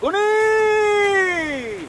Male yelling, wind noise in background. Audiotechnica BP4025 stereo mic, Shure FP24 preamp, Olympus LS10 recorder. Recorded near Osuna, S Spain